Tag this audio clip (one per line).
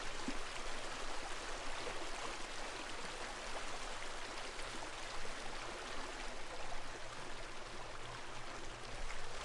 Sound
nature
Wild
ambiance